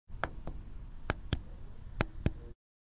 Smartphone Button Click
A button being pressed on a smartphone with 3 slightly different timbres.
button, click, foley, iphone, mechanical, phone, plastic, press, push, smartphone, switch, synthetic